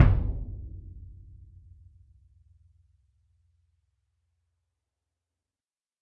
Symphonic Concert Bass Drum Vel35
Ludwig 40'' x 18'' suspended concert bass drum, recorded via overhead mics in multiple velocities.
bass, concert, drum, orchestral, symphonic